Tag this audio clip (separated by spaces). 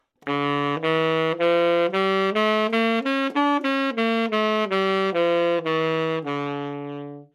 Dminor good-sounds neumann-U87 sax scale tenor